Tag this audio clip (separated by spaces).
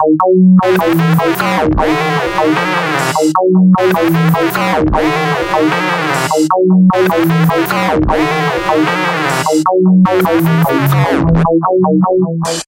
collect weird